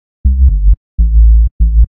untitledbeep-loop-122bpm-bassonly
only the bass sequence.
122bpm, bass, beeps, house, loop, minimal, tech, techno